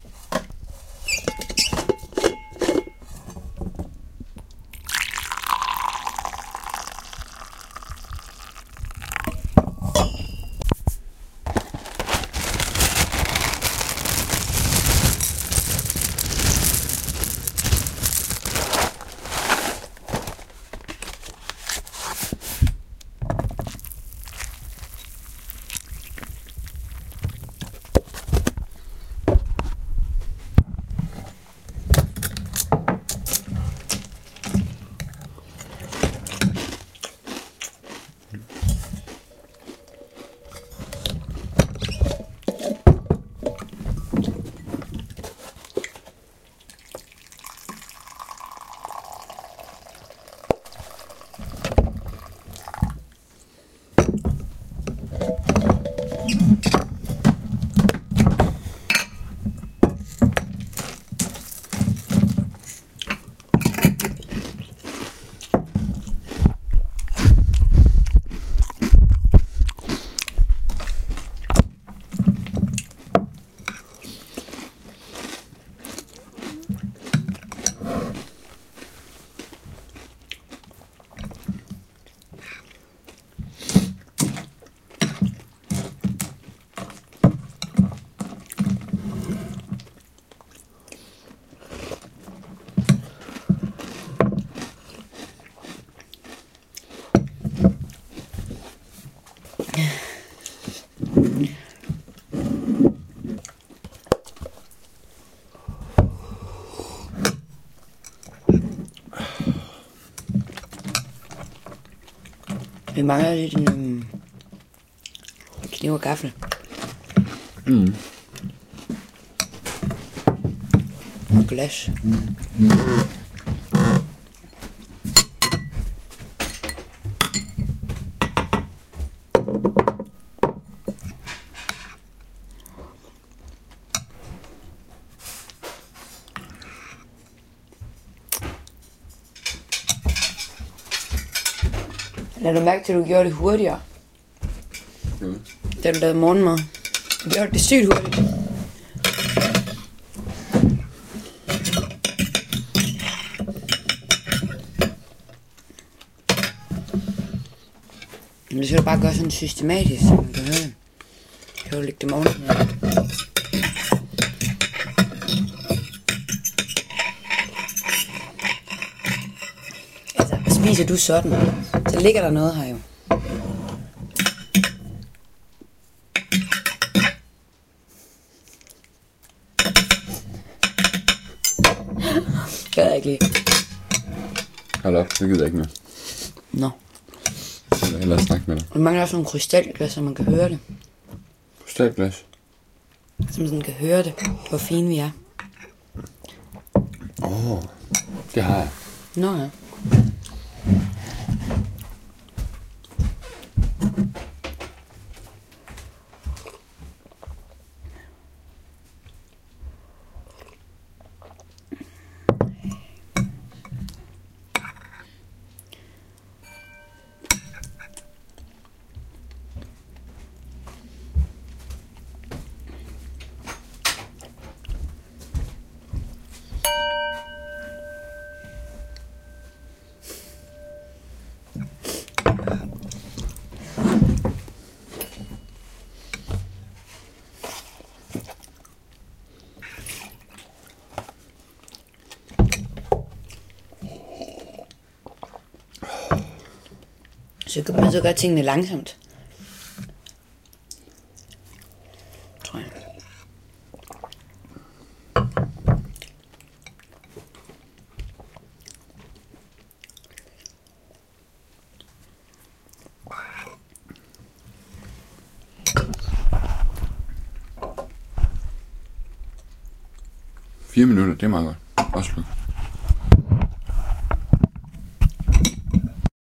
Breakfast soundscape
A recording of a breakfast setup. It is quite overdone, so that there should be way more than enough of all the sounds i tried to capture. I am sorry for the format.